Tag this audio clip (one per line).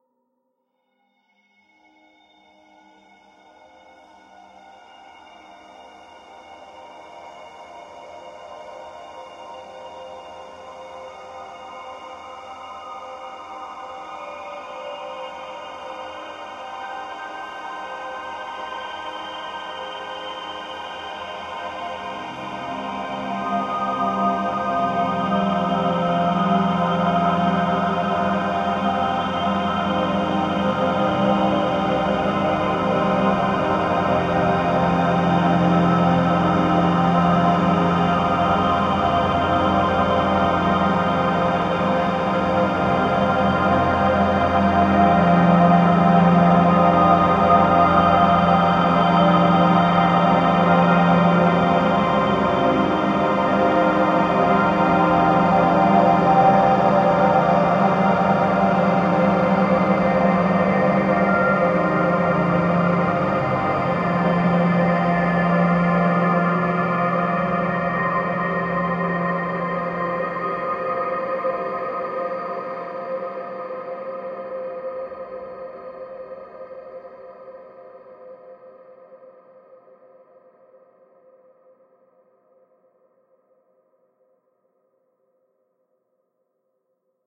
ambient
artificial
dreamy
drone
evolving
multisample
pad
smooth
soundscape